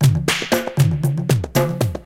A short tribal-style beat.